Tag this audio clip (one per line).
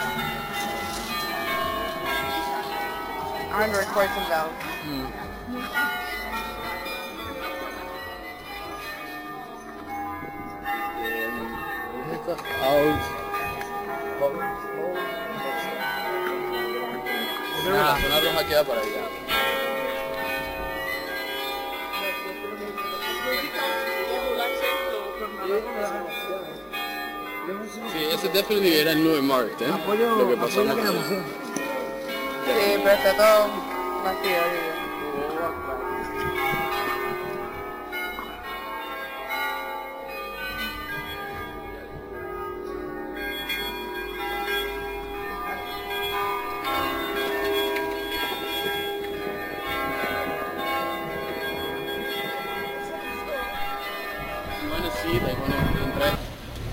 bells
church